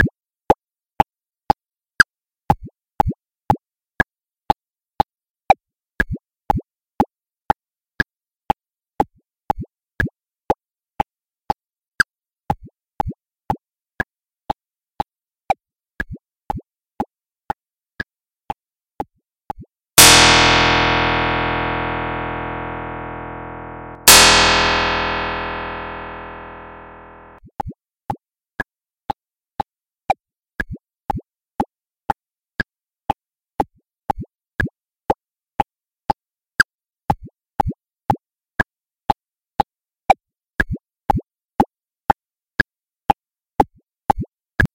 Create a new audi0 track.
Generate > Sine, 100Hz, amplitude : 1, 1m
From 0.00 to 0.20 of the track, apply Fade out effect
From 0.90 to 1.00 of the track, apply Fade in Effect
From 0.00 to 0.20 and 0.30 to 0.45 apply Click Track
From 0.20 to 0.30 apply a Pluck.
Normalize
electro, music, test